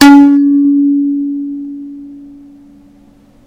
A single note from a thumb piano with a large wooden resonator.